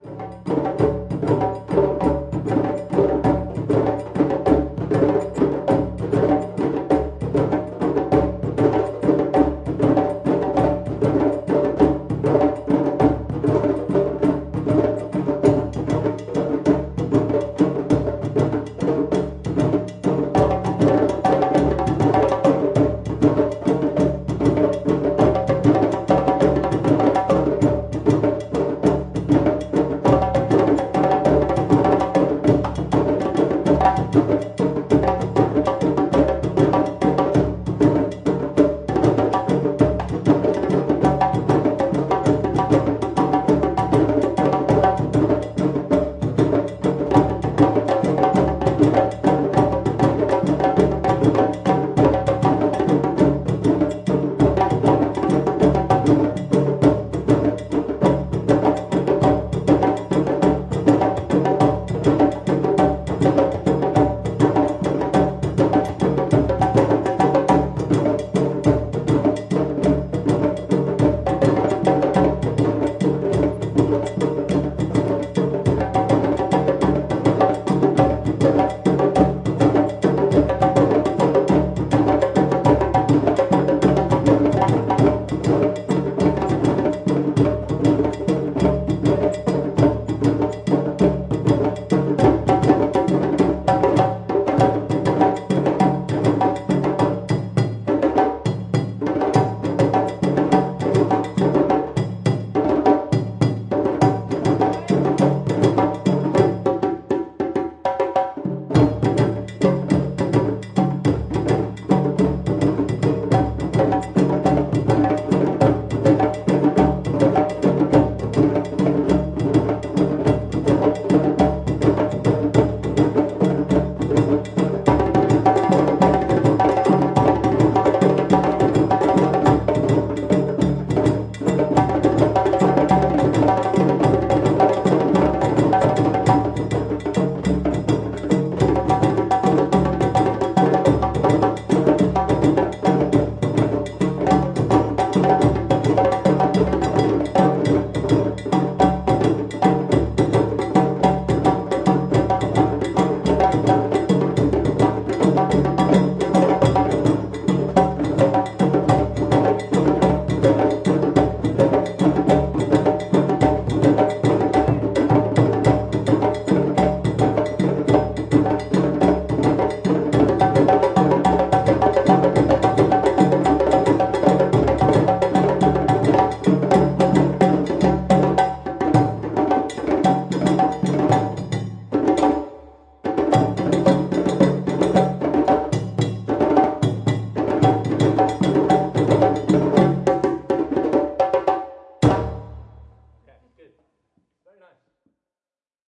African tribal drums, beat 2
Recorded on Zoom H4n.
Tribal drum beat performed by Drum Africa, London, UK.
africa, african, beat, djembe, drum, drummers, drumming, drums, field-recording, human, music, people, person, tribal, tribe